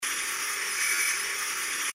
machine buzz; can't remember the details, sorry; not processed